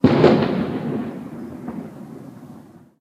A distant firework sound amplified using Audacity. Location: The Castle, Neutral Bay, close to the wharf, Sydney, Australia, 15/04/2017, 16:56 - 21:11.
Fire, Ringtone, Firework, Cannon-Fodder, Boom, Cannon, Amplified-Firework